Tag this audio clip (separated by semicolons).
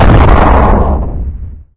Video-Game; Bomb; Boom; Explosion